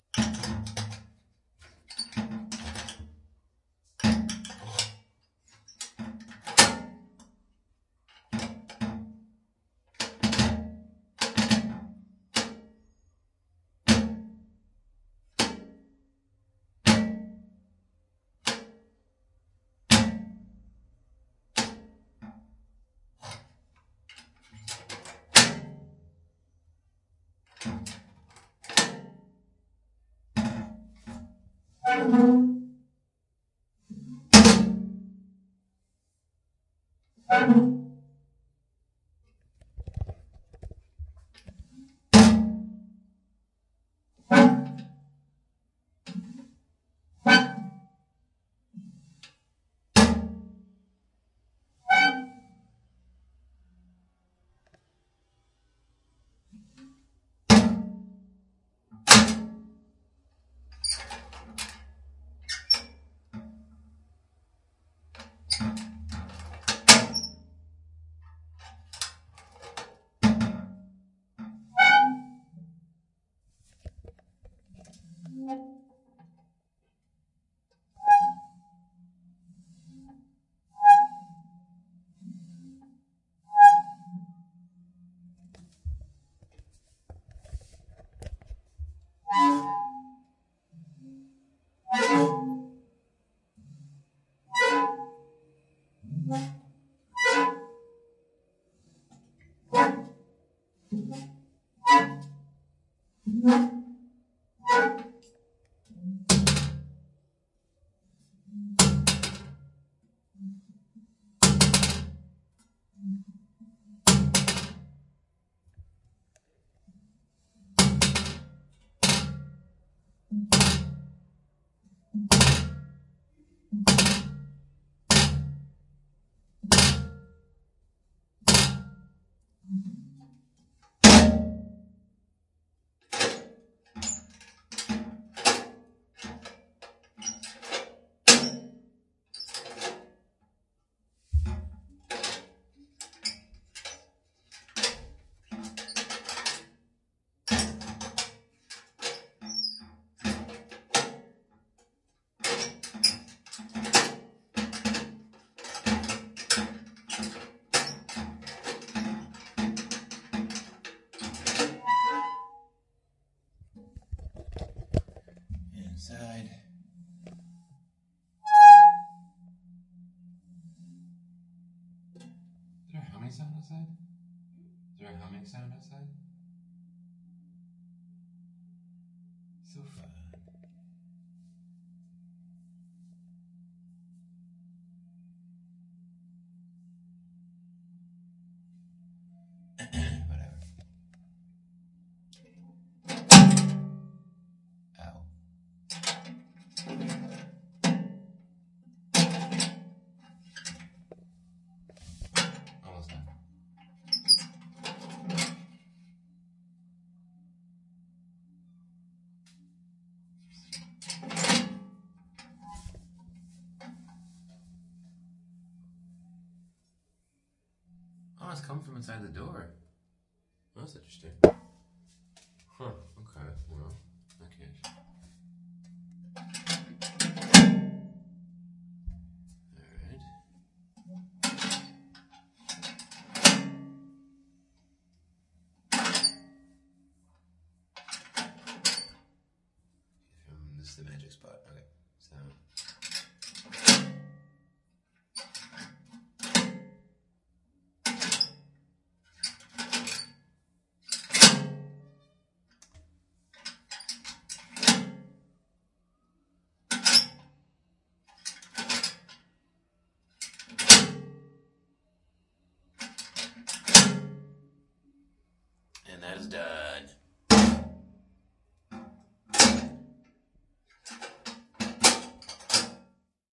safe deposit box lock-unlock
metal safe deposit box lock and unlock various. This sounds offmic to me, at least the parts recorded from outside the box, probably because I confused recording from front or back on my H2- and yet I don't hear my own breathing. anyway it's still good for anything but a cu
lock, deposit, unlock, box, metal, safe